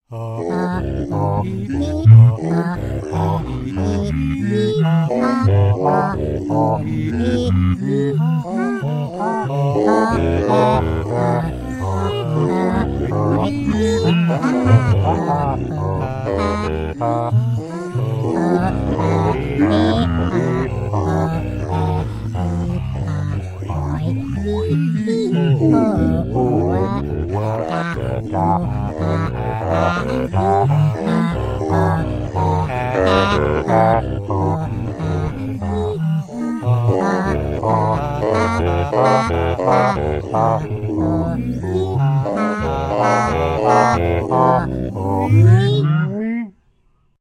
ahh eee ohh
Another silly bit, using only my voice and a delay plugin. Recorded into Logic Pro X.
cartoon, effects, funny, silly, vocal